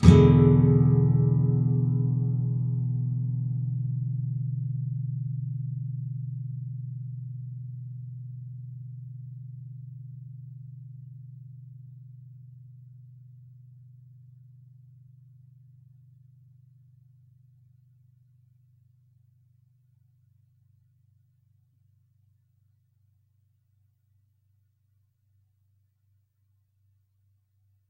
E7th up
Standard open E 7th chord. The same as E Major except the D (4th) string which is open. Up strum. If any of these samples have any errors or faults, please tell me.